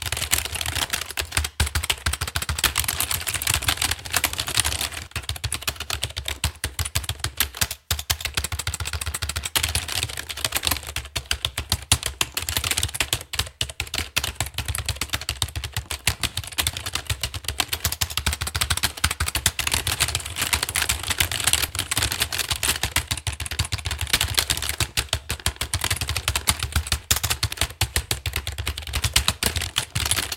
computer keyboard
Computer-Keyboard, keys, writing